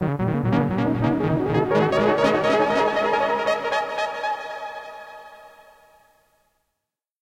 synth sequence
Simple and short sequence generated by software synthesiser.